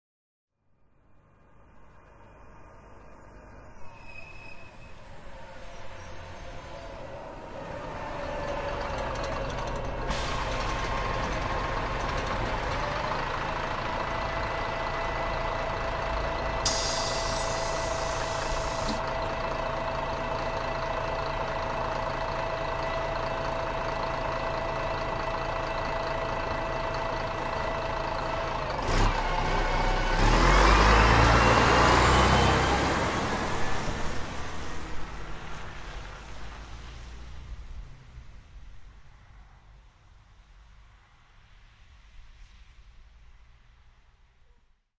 Bus Volvo
volvo bus stopping, standind and going
station, bus, stoping